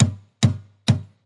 another sound of an espresso machine knockbox
coffee, bar, machine